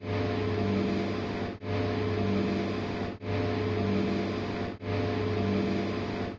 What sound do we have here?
Recording of a drive on lawnmower, that's been processed.
Sound-design, Processed